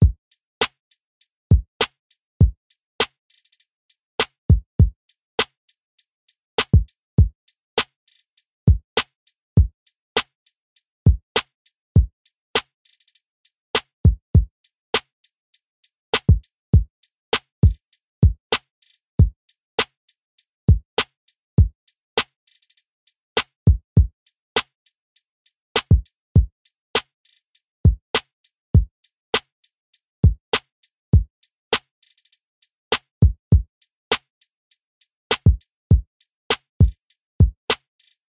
Hip-hop drum loop at 201bpm